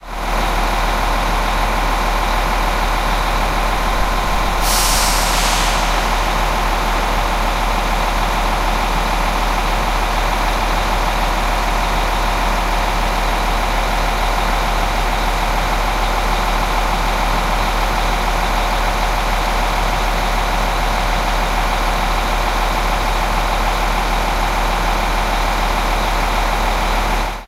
DR-100 0022 Diesel Train in Aarhus
In the city of Aarhus, Denmark, very early morning the empty train station gave me the chance to record the diesel engine of the regional train's locomotive